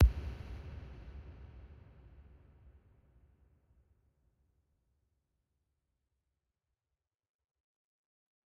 Decent crisp reverbed club kick 11 of 11